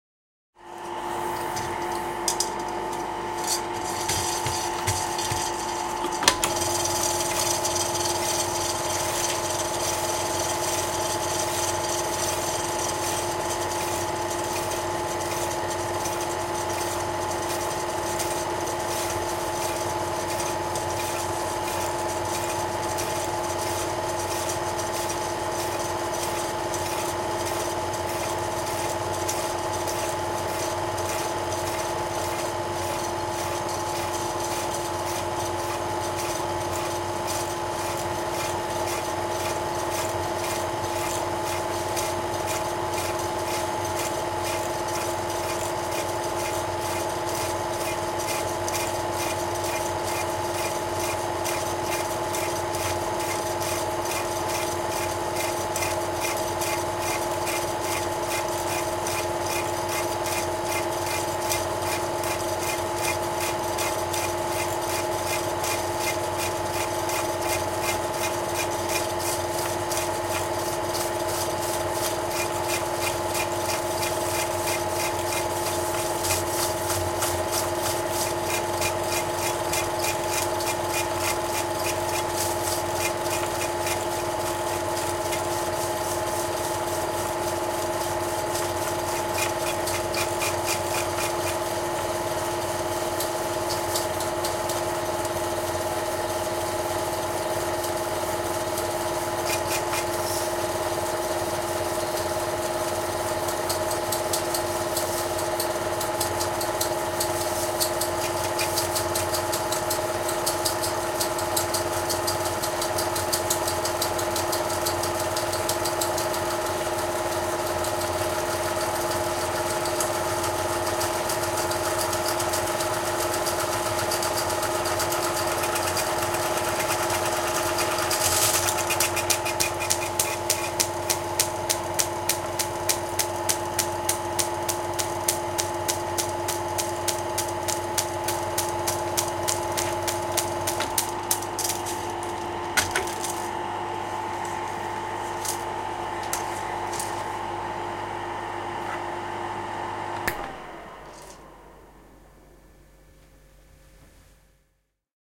Kaitafilmiprojektori, filmiprojektori, kelaus / Film projector, narrow-film, load up, rewind, film rasping, loose end flapping, stop, switch off, a close sound, Bolex SP80
8 mm projektori Bolex SP80. Filmin pujotus, kelaus, projektorin surinaa, filmin rahinaa, pysähdys, filmin pää jää läpsymään, sammutus.
Paikka/Place: Suomi / Finland / Nummela
Aika/Date: 26.10.1999
Field-Recording, Film, Film-projector, Filmi, Filmiprojektori, Finland, Finnish-Broadcasting-Company, Flap, Flapping, Home-movie, Kaitafilmi, Projector, Projektori, Rewind, Soundfx, Suomi, Tehosteet, Yle, Yleisradio